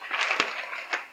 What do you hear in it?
cardboard-wrinkling
Cardboard wrinkling. Visit the website and have fun.
cardboard, wrinkle, wrinkles, wrinkling